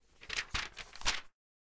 Page flipping
Paper page flip